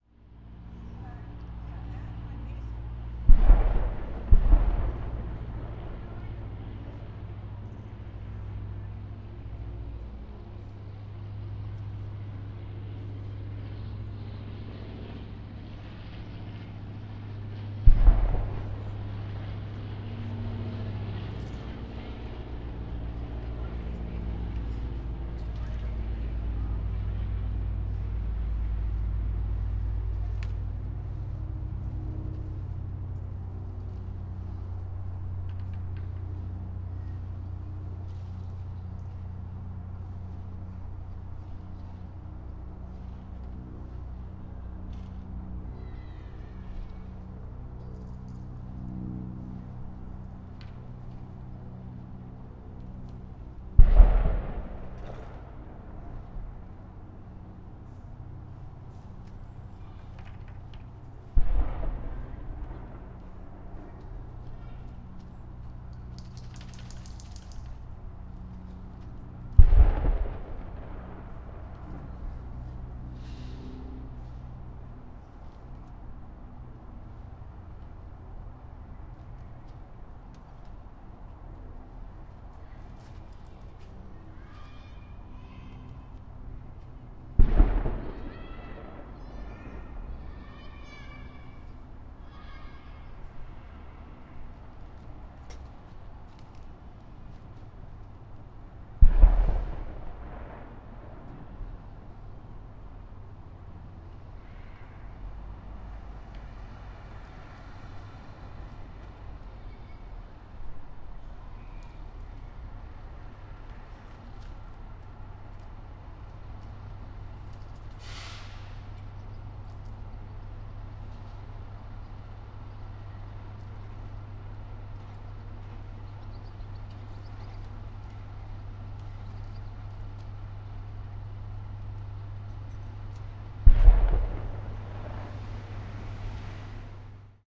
These are several cannon shots as recorded outside my window from an airshow at a nearby airport. The mic was a large diaphragm condenser modded by Michael Joly Eng. Format is mono, 44.1/24
army, gun, shots, cannon